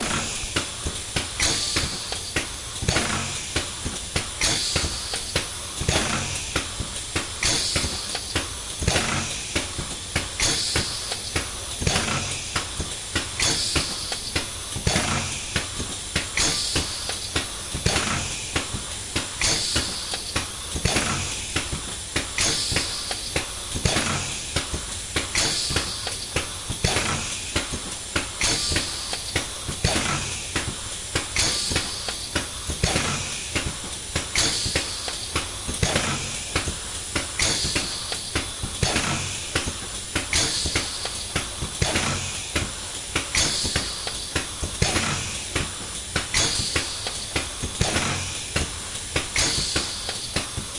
a laboratory testroom filled with pneumatic magnetic valves in constant action
valves, laboratory, breath, experiment, electromagnetic